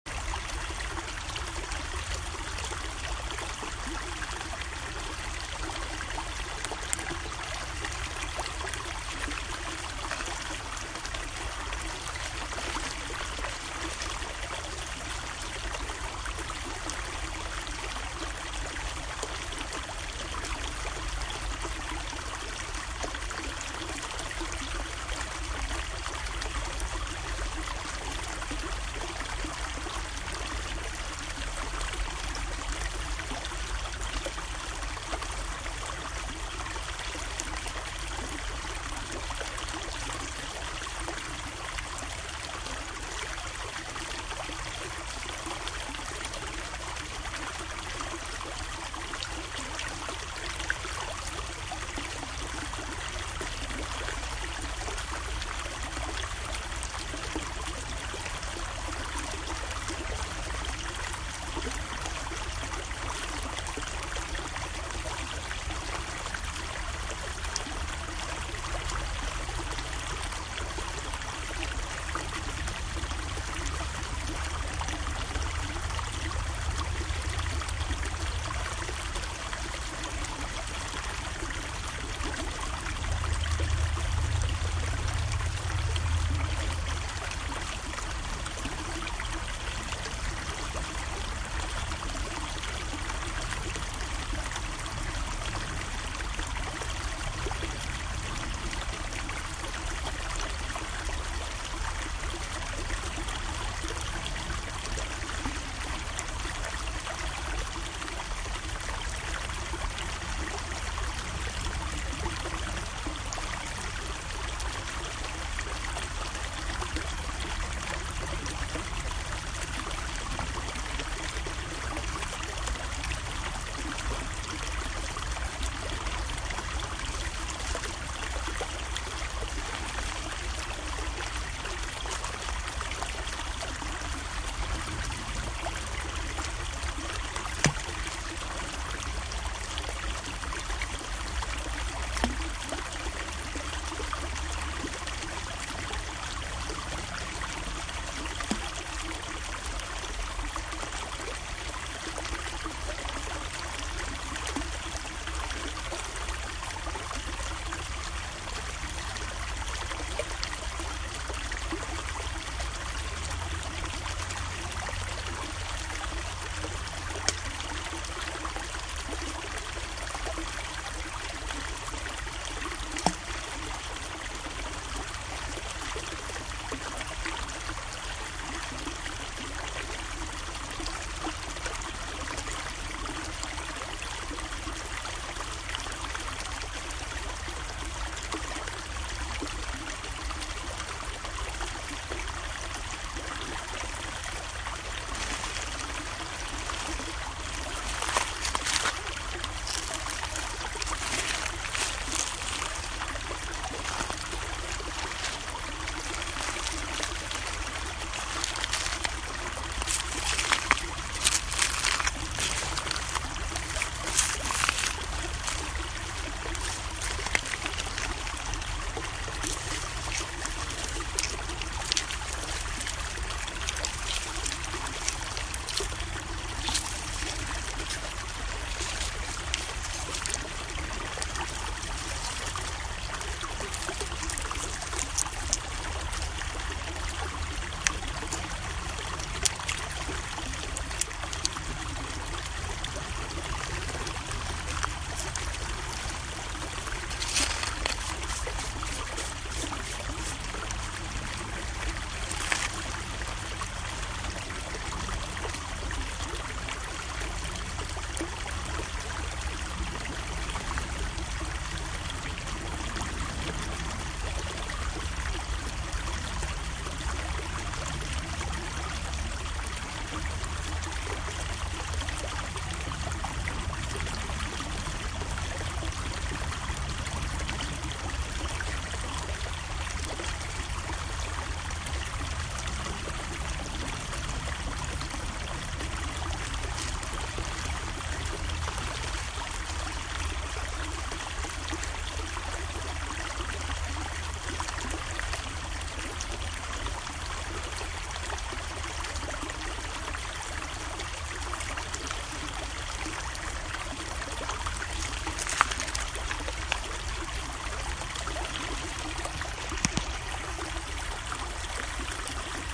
Running Stream with rocks splashing, rubbing, gravel, walking
Recorded with an iPhone SE. A soothing steady stream with some nearby pebbles being lightly tossed into the water. Some rubbing of hands & rocks, and light walking on nearby stones. Some light humming from a nearby road.
Thank you for using my sound for your project.
splash, crunch, plunk, pebbles, river, relaxing, nature, creek, soothing, walking, gentle, flow, relax, flowing, ambient, rocks, water, water-flow, stream, field-recording, babbling, brook, stones, rubbing